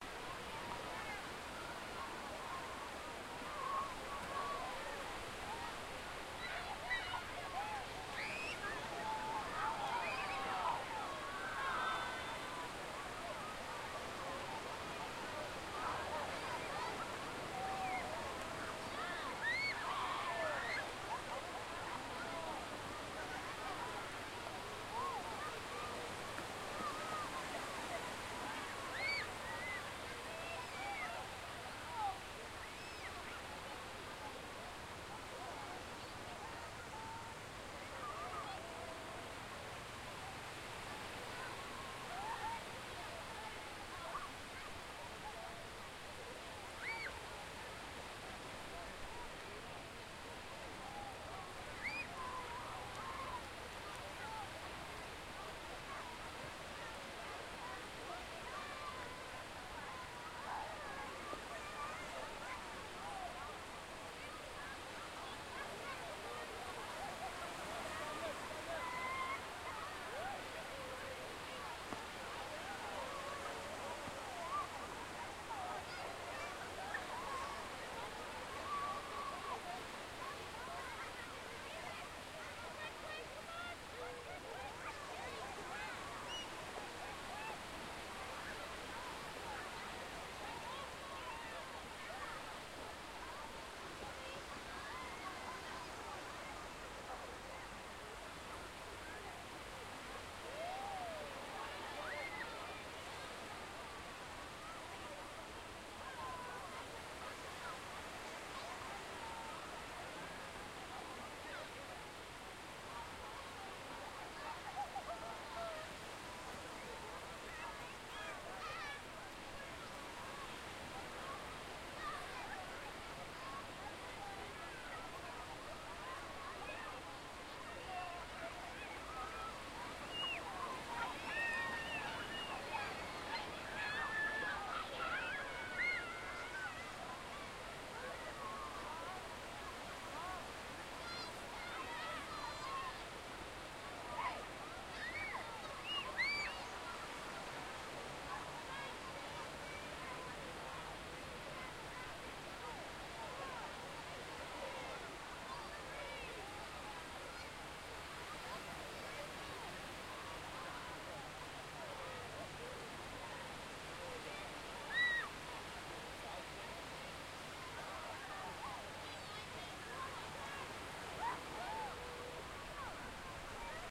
This is version 1 of an atmos recording of a busy beach during the summer. In this version I am facing the sea so it picks up more of the surf sounds and people playing in the sea itself. Recorded on a Tascam DR-40 using internal stereo mic.
See version 2 for atmos recording where I have my back to the sea and pick up more of the beach itself.